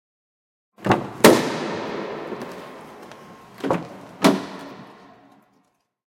MITSUBISHI IMIEV electric car DOOR open
electric car DOOR open
DOOR, electric, car, open